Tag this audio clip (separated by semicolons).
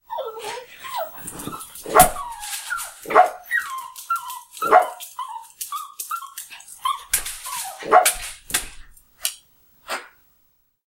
dog; yelp; scratch; scratching; bark; anxiety